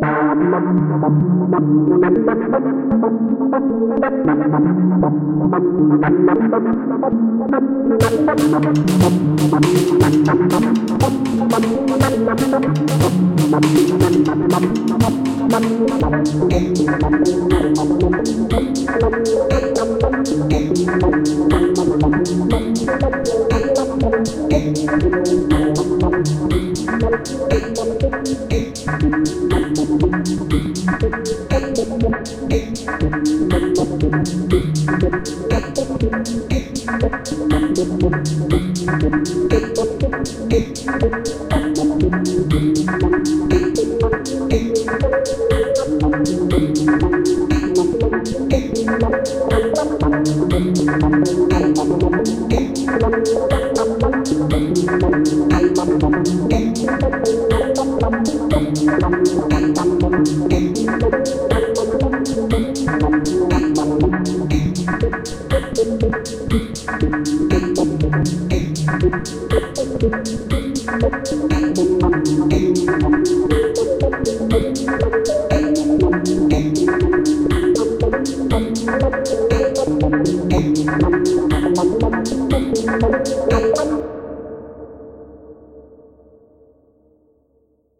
Day 5 5th July AlienXXX Nostromo
Day 5. A loop made from bits of these sounds by AlienXXX:
The name, you ask? I was trying to remember the name of the Novakill VST promoted in dare-39. But 'Nostromo' got there first, and then I found it was coincidental.
Edited in Audacity- reverb added.
This is a part of the 50 users, 50 days series I am running until 19th August- read all about it here.